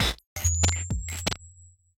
weird 1 break166
Another loop at 166BPM, glitchy, minimal, weird, useless.